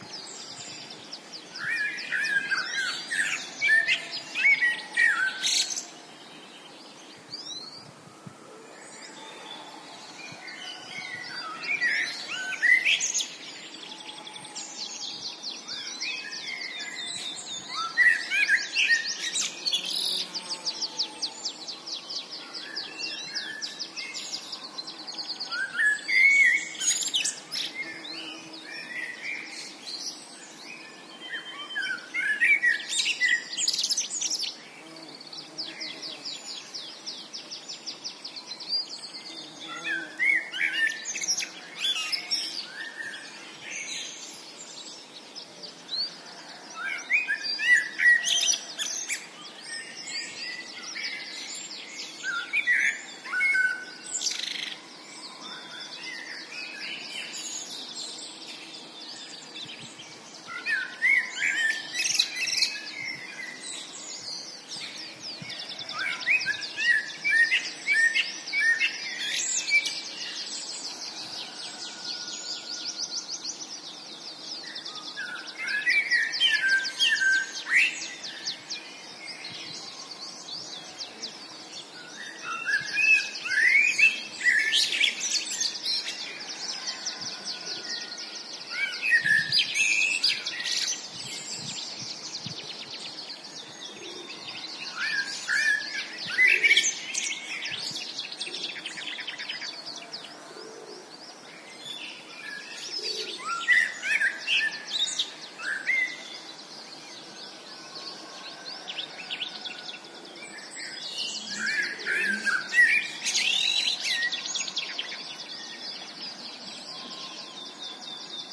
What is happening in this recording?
spring atmosphere with lots of birds singing (mostly Blackbird) recorded at a narrow, resonant ravine near Artenara (Gran Canaria). PCM M10 internal mics